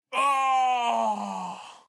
ARRGH MALE50 12
I uploaded this after I finish my job (for scoring a music and additional foley/sfx) and by the time for cleaning, i found some of them were not used, were not even reviewed. I have several unused items. As I have benefited several times from this website, it's time to give back. Why not.
This was recorded in my home studio, using my condenser microphone, the iSK U99 (Neumann knock-off, so the seller and a friend said to me; I wouldn't know however.) Powered by Apogee Duet Preamp and simple shock-mount, I hope this recording clean enough for many uses. I recorded in close proximity, hence the high frequency a bit harsh. No edit. No effect. Cheers.
FOLEY, HOME